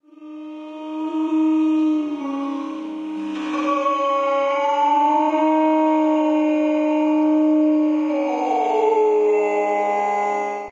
Horror Sounds 4
scary, thrill, sinister, creepy, fear, scream, horror, spooky, ghost